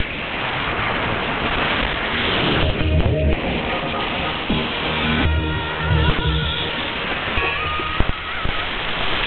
Various stations overlap2 2014-09-06T23-13-17
Various radio stations overlappting.
Recorded from the Twente University online radio receiver.
am, overlap, radio-overlap, short-wave, static